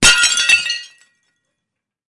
A plate that is dropped and broken.